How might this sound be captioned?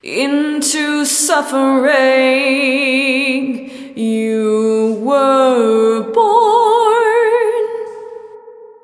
female vocal singing "into suffering you were born"
Short vocal clip (from my song "candles"), singing "into suffering you were born".
Recorded in a damp basement on a summers day, using Ardour with the UA4FX interface and the the t.bone sct 2000 mic.
Original file where clip was used:
dark, female, female-vocal, katarina-rose, singing, suffering